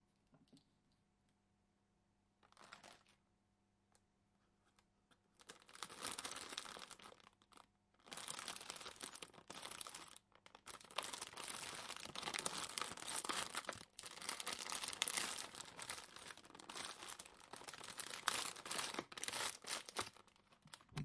cookiecrack original
random, crack